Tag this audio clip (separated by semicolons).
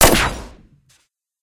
lazers; sci-fi; weapons